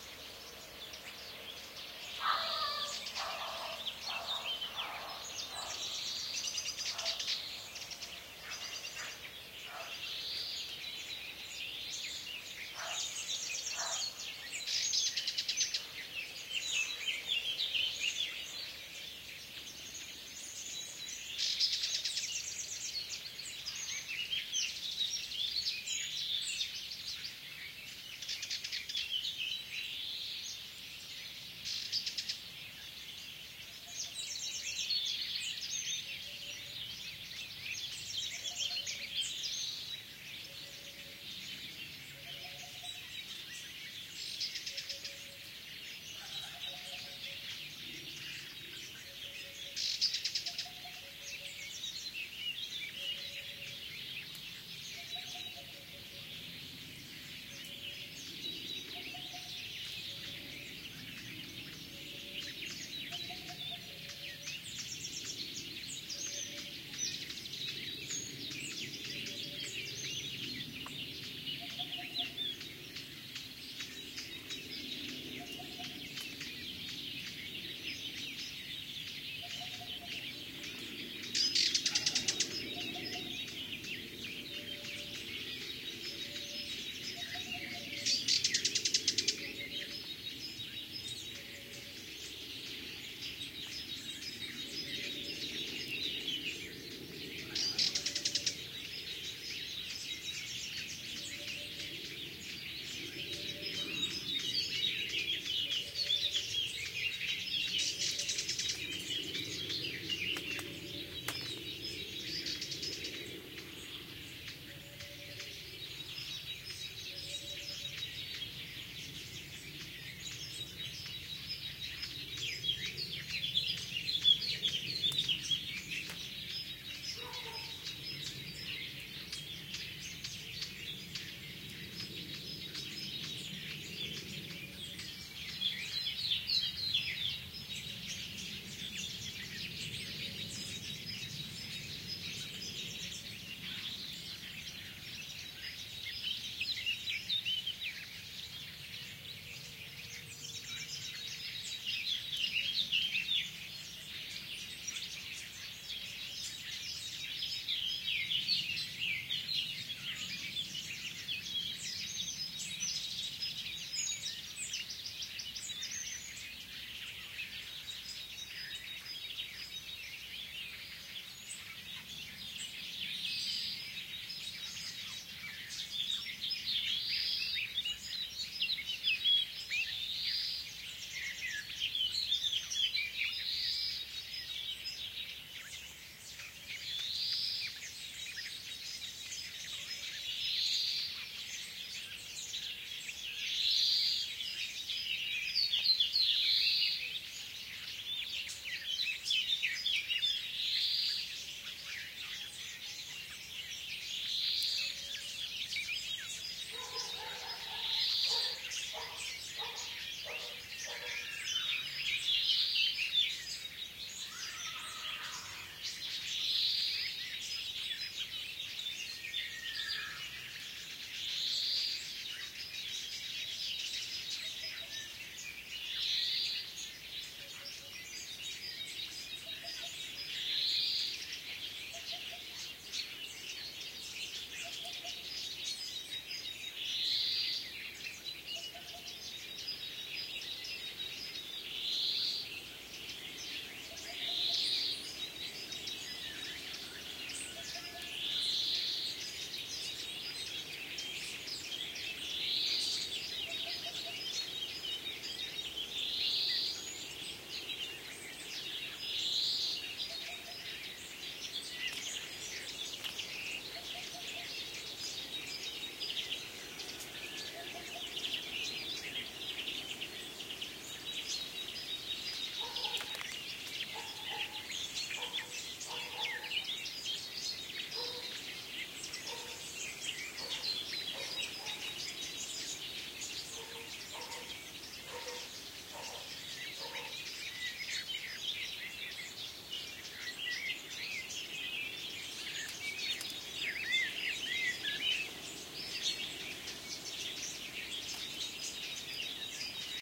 20080322.joyful spring.00
field spring ambiance with lots of birds singing (hoopoe blackcap great-tit serin), occasional planes overheading and dogs barking. Recorded near Carcabuey (Cordoba, S Spain). These files named joyful.spring are cut from a single longer recording an can be pasted together